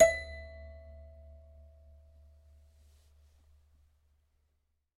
multisample pack of a collection piano toy from the 50's (MICHELSONNE)